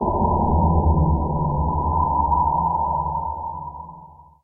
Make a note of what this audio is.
female voice breath 6
female voice band filtered "puf" sample remix
breath, transformation, female-voice